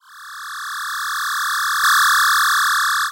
Longer noise.
MAY GET LOUD.
freaky, sci-fi, future